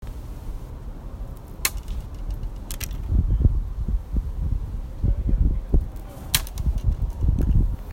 Breaking of a branch